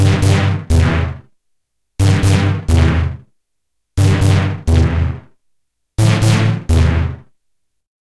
Don'tGoLead002
Very hard synth lead recoded from a MicroKorg. Short attack, clashing electric sound.
lead, hard, rhythmic